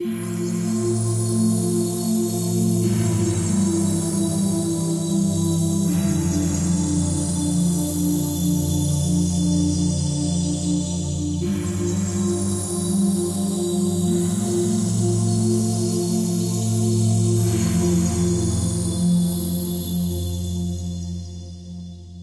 Live - Space Pad 04
Live Krystal Cosmic Pads